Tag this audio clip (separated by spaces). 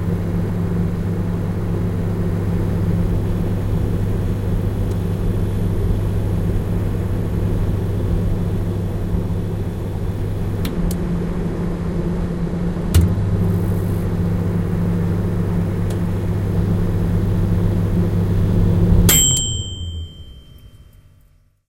kitchen noise